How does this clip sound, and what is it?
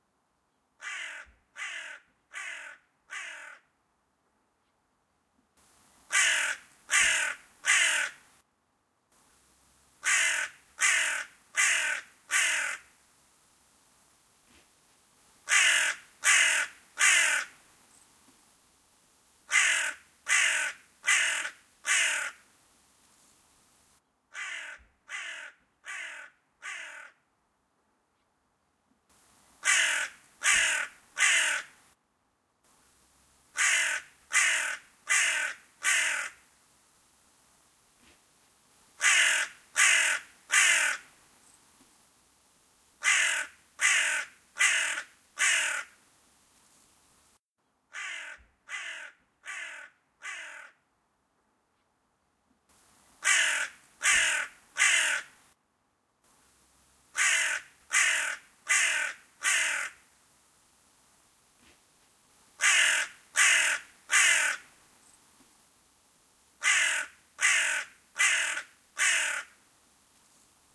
crow rington 16b
rington, crowing, crow